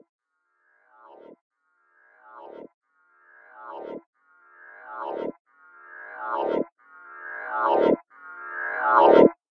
Plughole 1 longest and delay reversed
Synthetic sound out of propellerhead reason 5.1